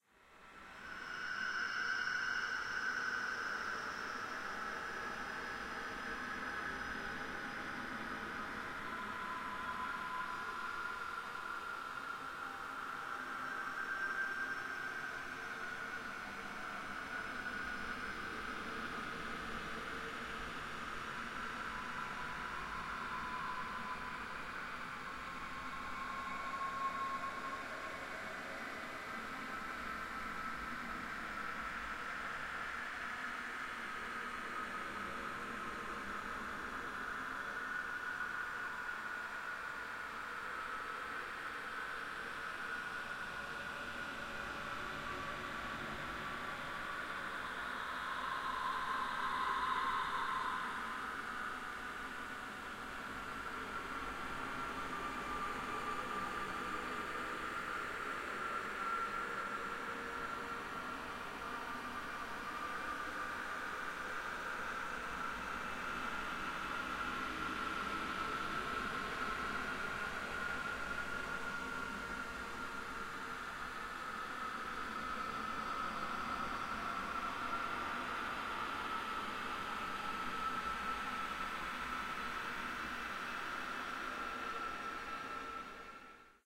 Distorted audio that evokes screams of torment.
Atmosphere, Evil, Freaky, Halloween, Horror, Scary, Terror